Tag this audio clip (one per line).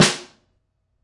reverb
lively
bathroom
snare
shower
echo
snaredrum
bright
drum
sd